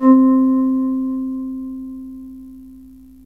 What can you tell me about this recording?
Fm Synth Tone 15